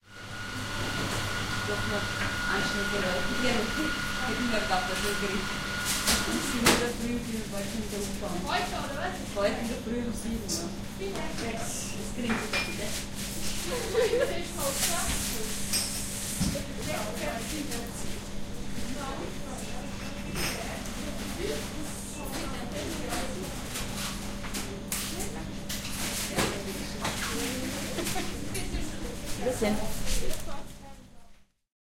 Bakery Shop (2) in Vienna, Austria
Inside a bakery shop in Vienna, Austria. Voices, Ambience. XY recording with Tascam DAT 1998, Vienna, Austria